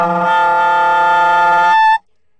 Tenor Sax Multiphonic a4
The format is ready to use in sampletank but obviously can be imported to other samplers. The collection includes multiple articulations for a realistic performance.
tenor-sax, woodwind, saxophone, jazz, sampled-instruments, vst, sax